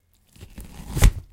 Tear then slice
Some gruesome squelches, heavy impacts and random bits of foley that have been lying around.
foley, vegtables, blood, splat, violent